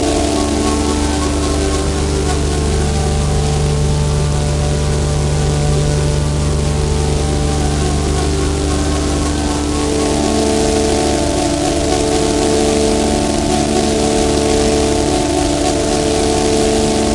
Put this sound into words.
harmonic background 01
harmonics, drone, noise